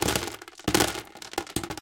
delphis ICE DICES LOOP #134 (BEAT)
PLAY WITH ICE DICES SHAKE IN A STORAGE BIN! RECORD WITH THE STUDIO PROJECTS MICROPHONES S4 INTO STEINBERG CUBASE 4.1 EDITING WITH WAVELAB 6.1... NO EFFECTS WHERE USED. ...SOUNDCARD MOTU TRAVELER...
134, 134bpm, bleach, crunch, dice, dices, ice, loop, shake